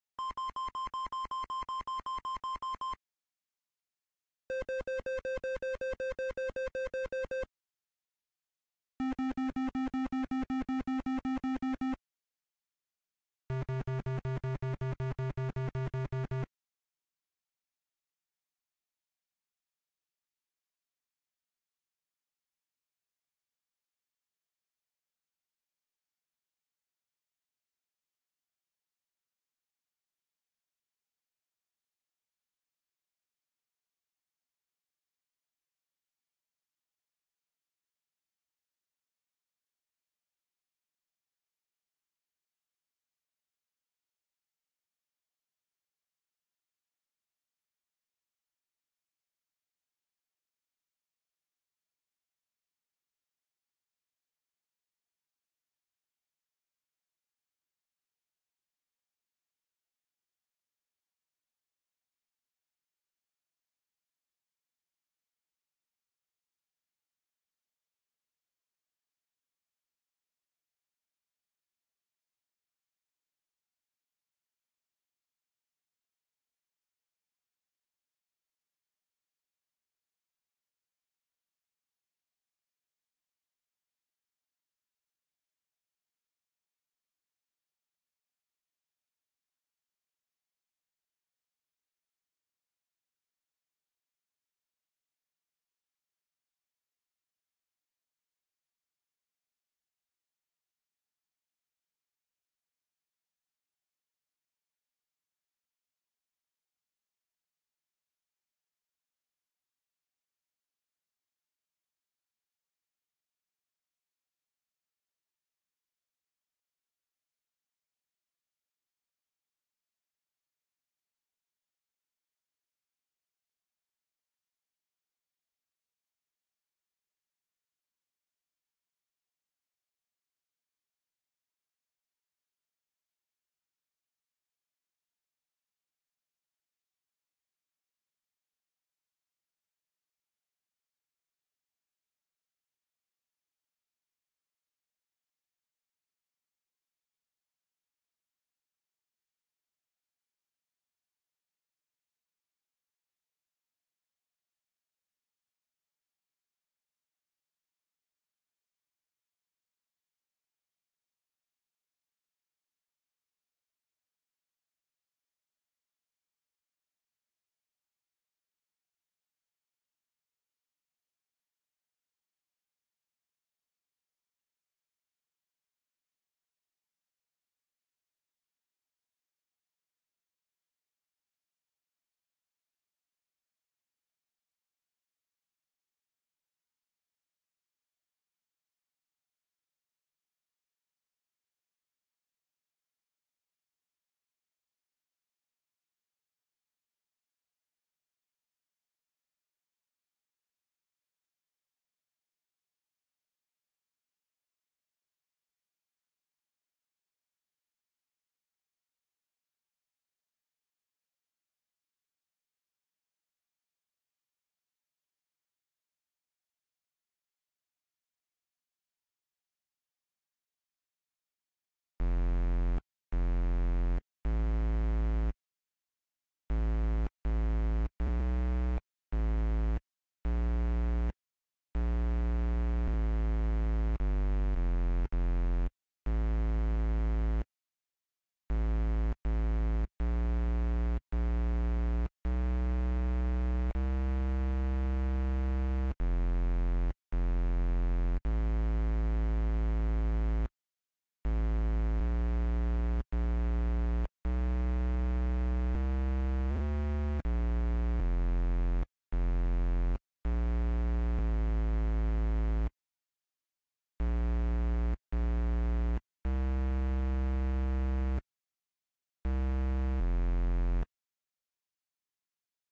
Paptone Pictures Target Lock 80 bpm
A target lock beep (in my mind similar to the beeps heard in the Death Star strategy session in Star Wars) playing at 80 BPM.
Action, HUD, Sci, Sci-Fi, Target